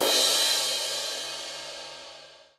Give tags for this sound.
bubinga crash metronome ride cymbal sticks hi-hat one custom drumset turkish wenge shot drum snare click one-shot cymbals

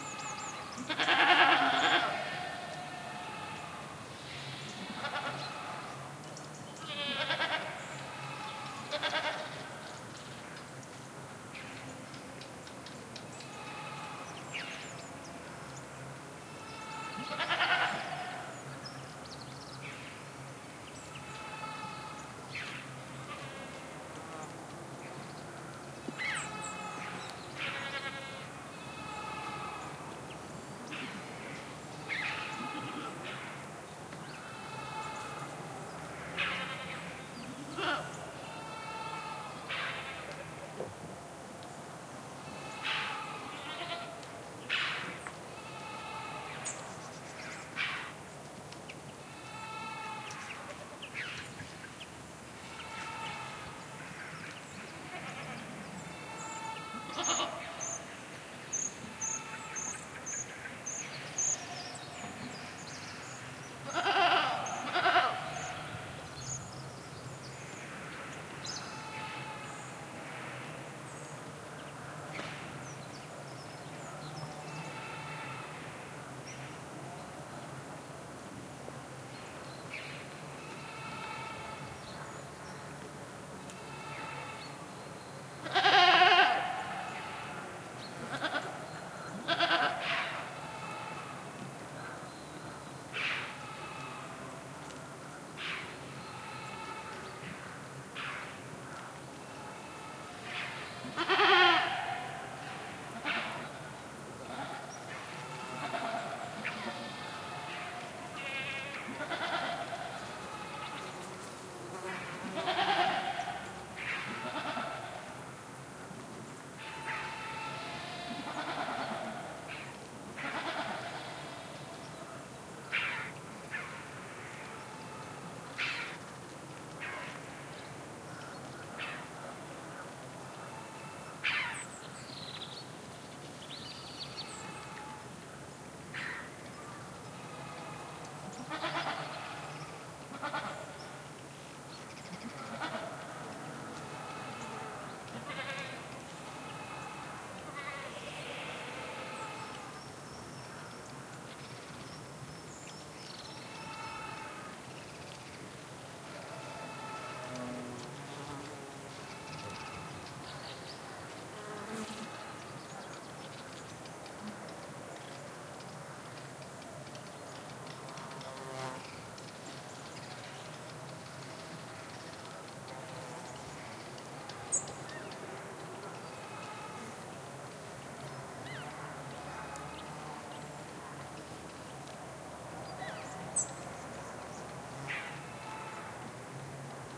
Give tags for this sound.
bleating chough goat mountain nature

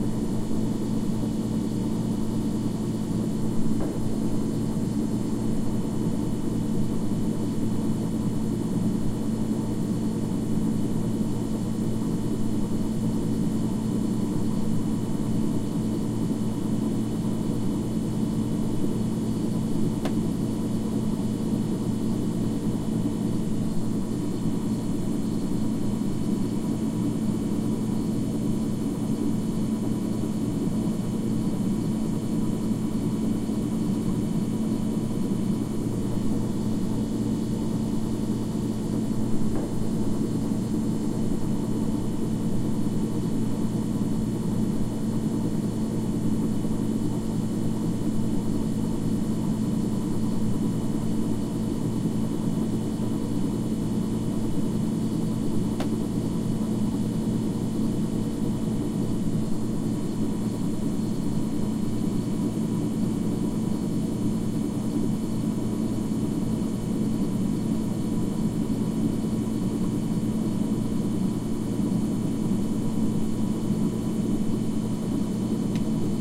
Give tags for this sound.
ambience industrial machine sound xbox

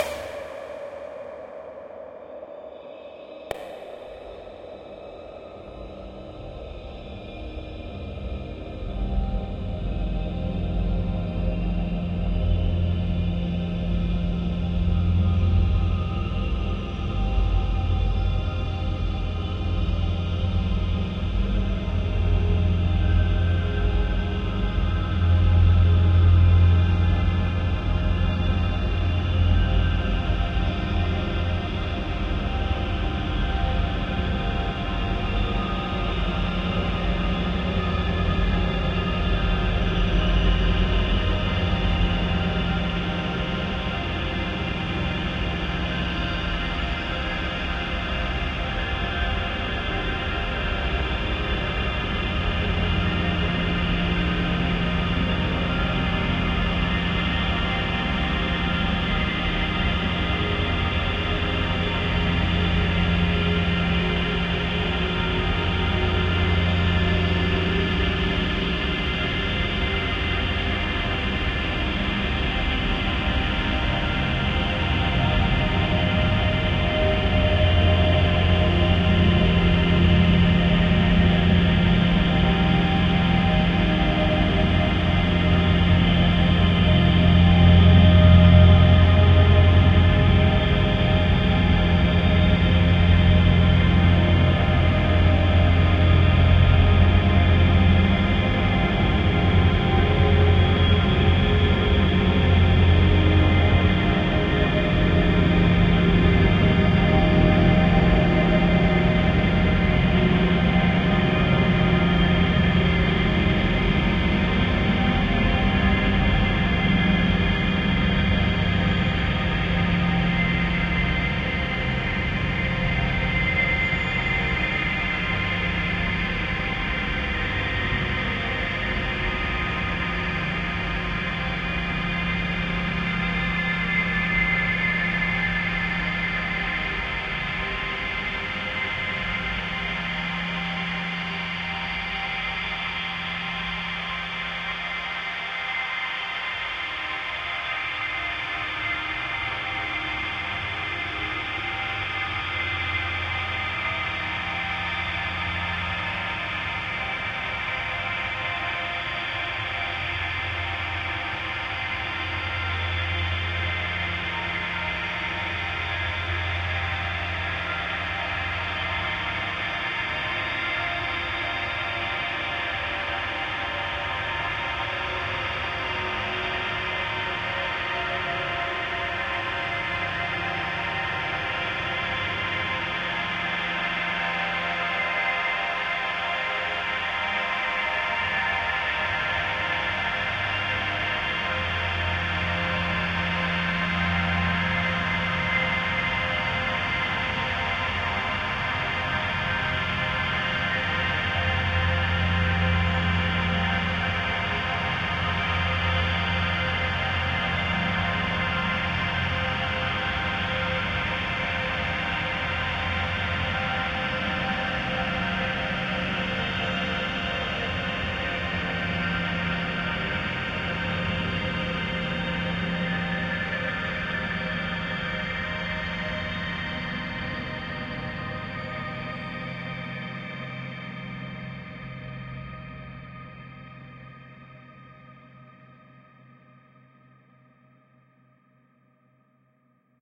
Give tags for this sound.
artificial dreamy drone ambient evolving divine pad soundscape multisample smooth

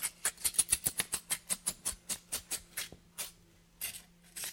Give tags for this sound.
objects variable brush thumps random hits scrapes taps

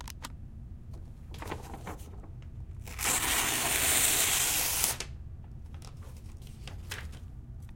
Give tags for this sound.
long paper rip

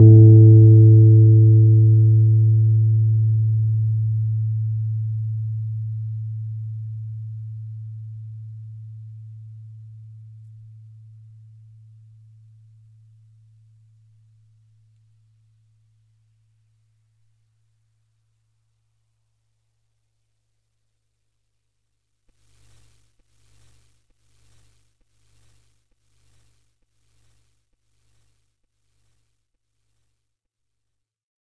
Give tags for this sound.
electric rhodes tine tube piano multisample keyboard fender

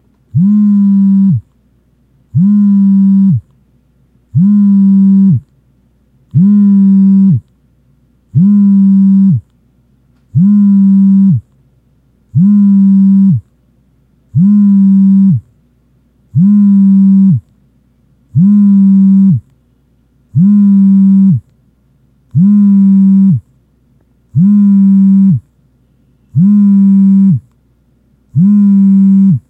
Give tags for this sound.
phone vibration mobile

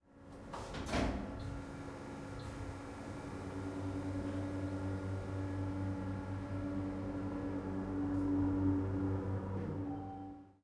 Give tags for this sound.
mechanical lift moving travelling elevator

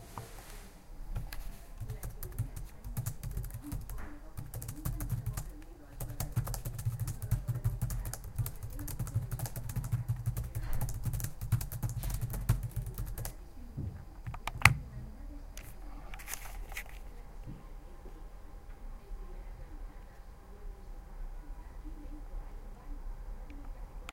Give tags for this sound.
sonicsnaps doctor-puigvert barcelona spain sonsdebarcelona

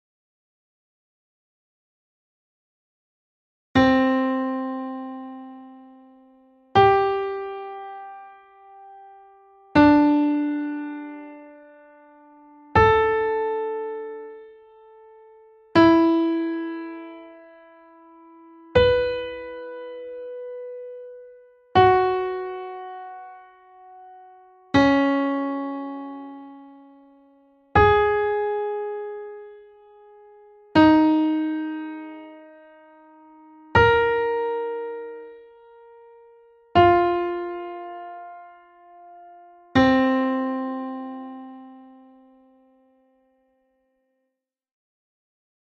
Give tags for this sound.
circle fifths notes whole

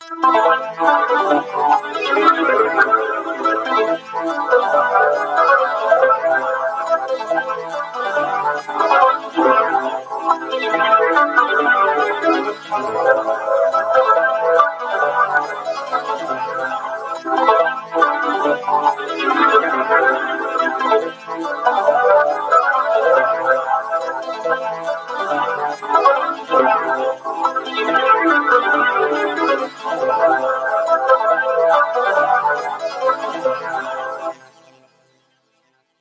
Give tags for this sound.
ambience vocoder lmms atmosphere soundscape ambient

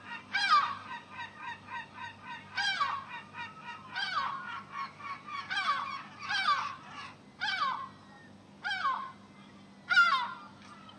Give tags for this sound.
birds birdsong field-recording

